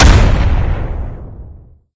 This is a mix of different sounds overlayd with audacity. I adjusted the volume levels and replay speed of a basketball and some other low quality sample. It was planned to sound like a shotgun in a private fun video, but my friend just forgot to add it in the video.
It is only 2 very old Samples:
1.
2.
The modules were downloaded 4 free years before - I recycled some samples. I started tracking modules before, but in 1999 I loved to work with the ModPlug-Tracker. I used it to extact/change samples, insert my own recorded samples and later on I started over with BUZZ.